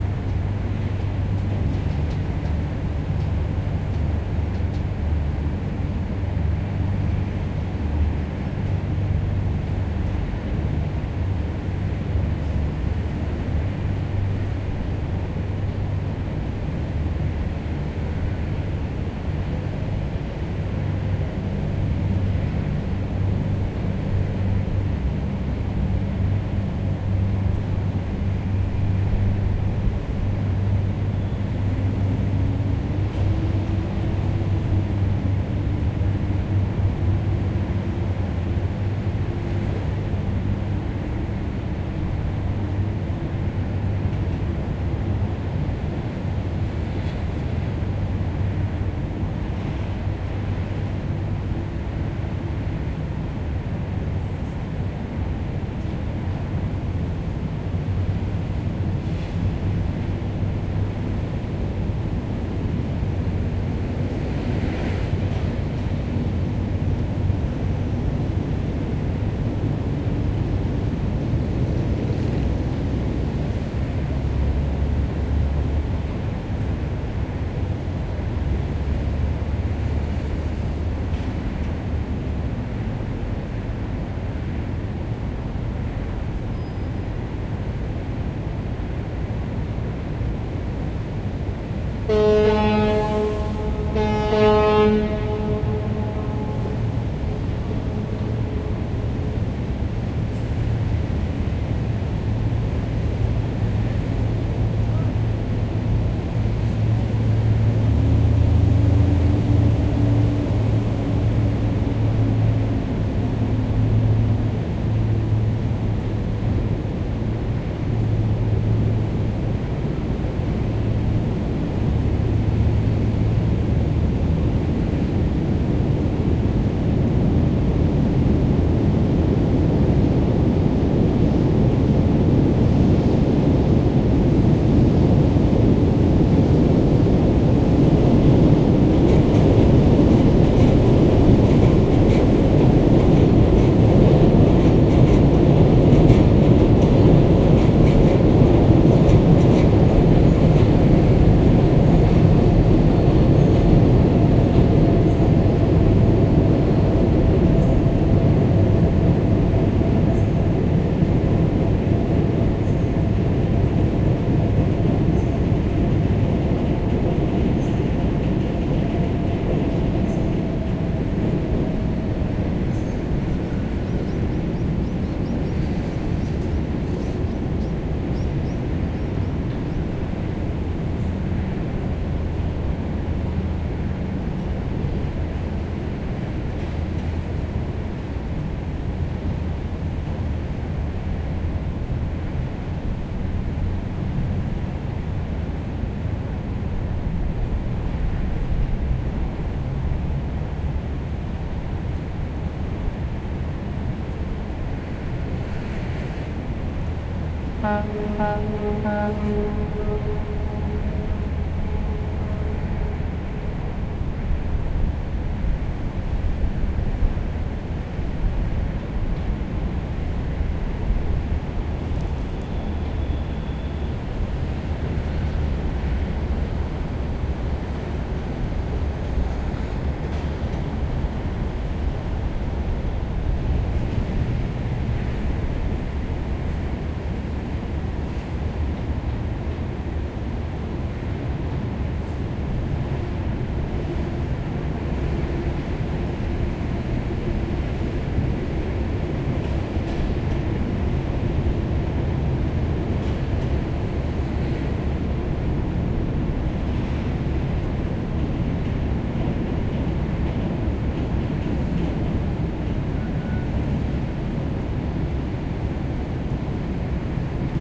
JJMFX Underneath Sydney harbour bridge (boat horn, train overhead, industrial, light breeze)
Underneath Sydney harbour bridge (echoey boat horn, train overhead, industrial, light breeze)
Boat, City, Harbour, Horn, Passing, Skyline, Sydney, Train